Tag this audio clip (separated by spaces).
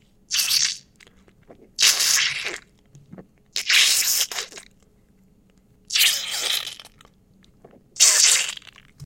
squish gross gore